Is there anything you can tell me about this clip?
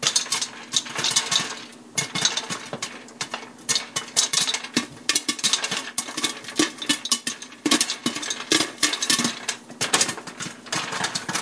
The sound of popping popcorn
cooking, food, popcorn